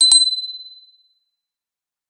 Small Bell
Small Christmas bell
Audient mic pre, Rode NTK, X Noise, low cut etc
Dingaling
bell, chime, chiming, christmas, ding, dingaling, fairy, magic, metal, ping, ring, ringing, small, tubular